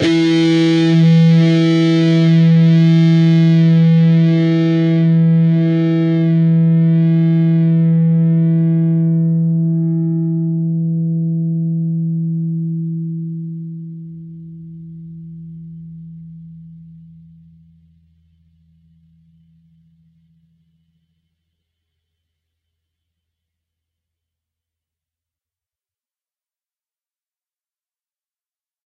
E (6th) string, 12th fret harmonic.